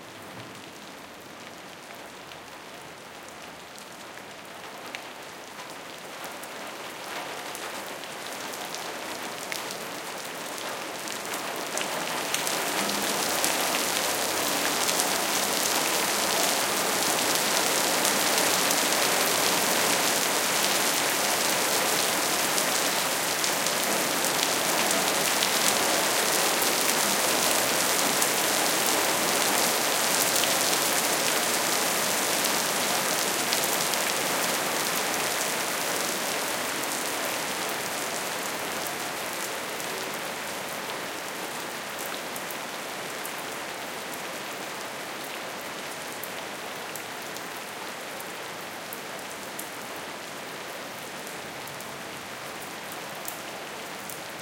sudden heavy shower falling on concrete floor